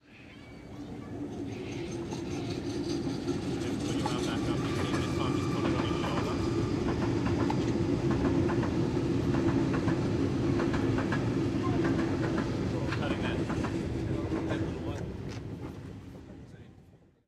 Metro overpass
Sydeny metro train on an overpass.